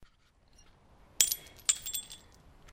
Glass Smash 9
One of the glass hits that I recorded on top of a hill in 2013.
I also uploaded this to the Steam Workshop: